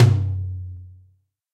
A low pitched, lo-fi, very fat sounding drum kit perfect for funk, hip-hop or experimental compositions.